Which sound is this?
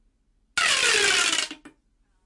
One of those bendy straw tube things
bendy, noise-maker, pop, popping, toy